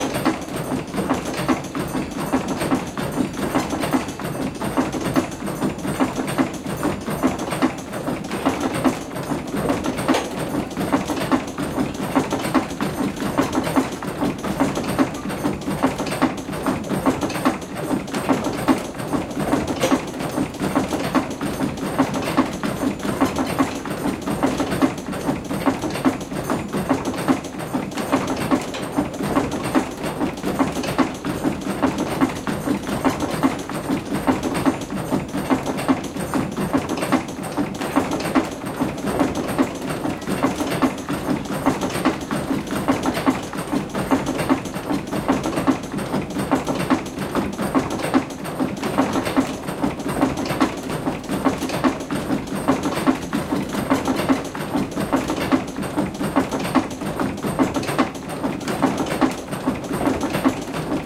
Wooden Gear inside of old Watermill
That sound was recorded in Mai 2016 inside an old watermill house in Bavaria, where the old waterwheel still drives a wooden gear with cogwheels. Originally that watermill had several purposes like hammering, sawing, pressing oil from different fruits or producing paper.
external, field-recording, stereo, recorder